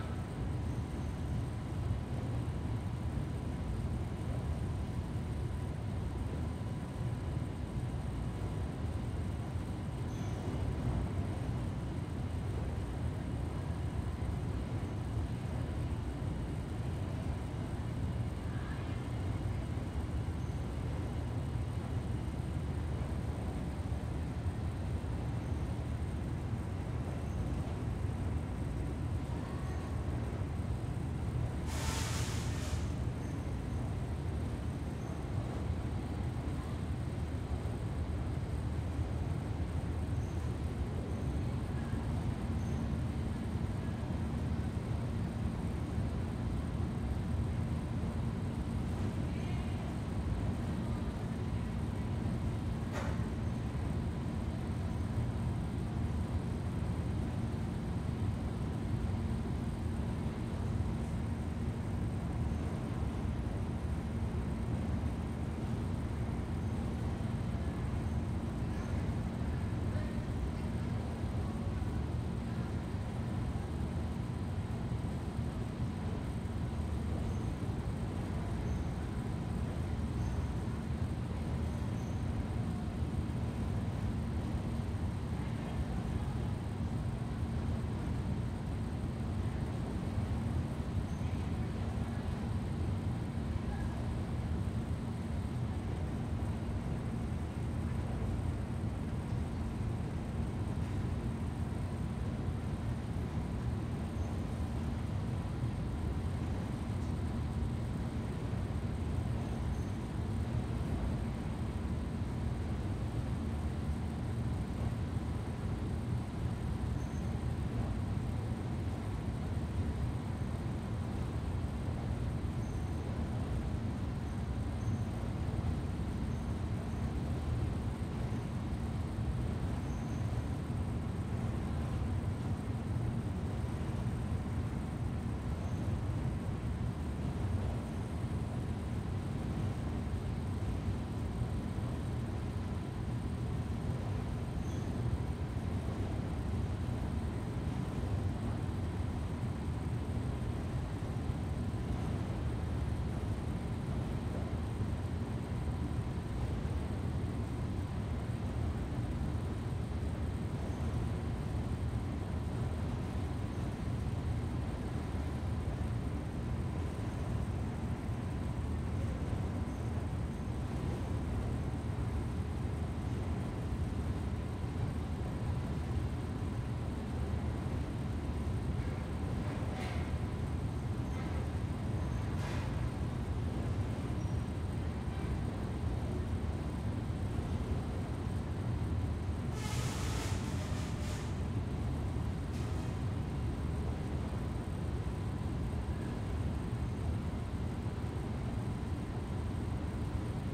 Constant hum recorded at a jeans factory, with washing machines in the background and a few steam hisses. Recorded at Monterrey, Mexico with a Neumann 82i microphone and Zaxcom Fusion II recorder.